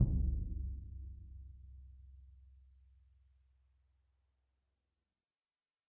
Symphonic Concert Bass Drum Vel10
Ludwig 40'' x 18'' suspended concert bass drum, recorded via overhead mics in multiple velocities.
bass, concert, drum, orchestral, symphonic